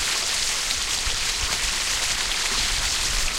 The sound of a small stream, looped.
From old recordings I made for a project, atleast ten years old. Can't remember the microphone used but I think it was some stereo model by Audio Technica, recorded onto DAT-tape.